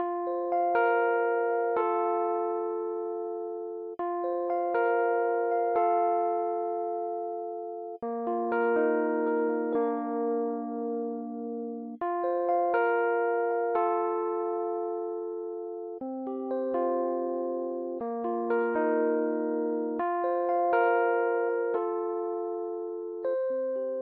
Song3 RHODES Fa 4:4 120bpms
Fa, blues, beat, HearHear, Chord, loop